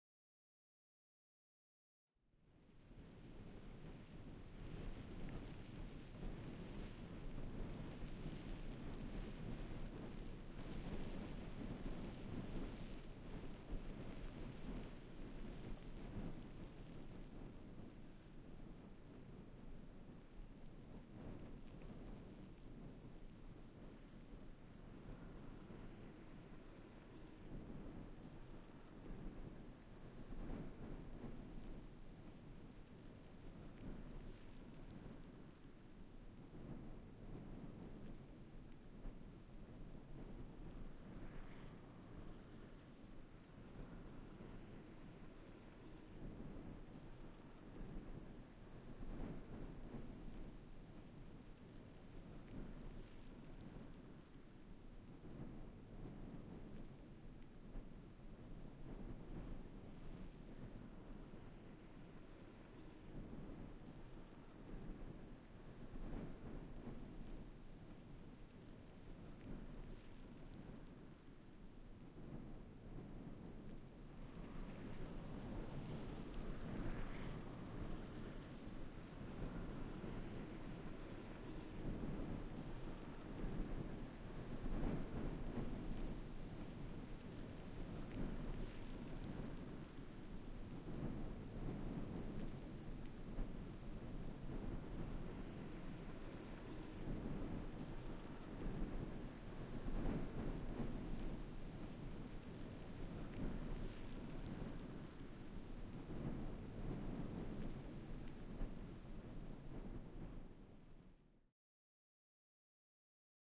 swirling winter wind gusty grains sand
swirling, gusty, wind, powder, sand